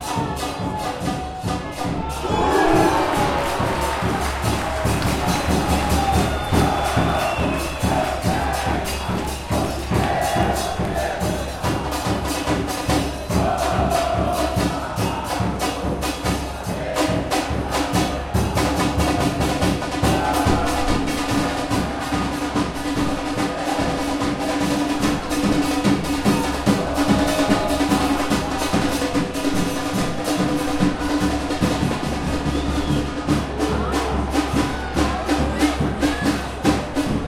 TRATADA190127 0793 organoizada uuuuuu
Radio Talk - Stadium - Recording - Soccer - Ambience
Stadium, Talk, Recording, Radio, Ambience, Soccer